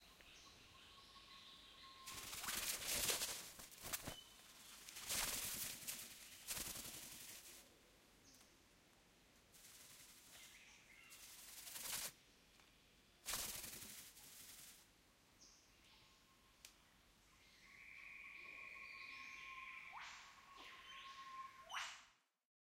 Riflebird Flapping 4
Riflebirds eat grapes placed on the table on which the microphones were sitting. Fly in and fly out. Audio Technica AT3032 stereo microphone pair - Sound Devices MixPre - Edirol R09HR digital recorder.